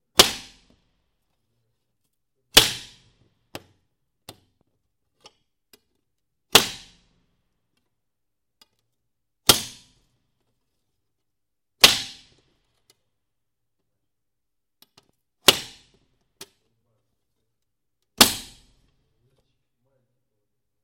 Windows being broken with vaitous objects. Also includes scratching.
break, breaking-glass, indoor, window